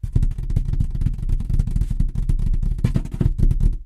rustle.box-fingers 1
recordings of various rustling sounds with a stereo Audio Technica 853A
cardboard, box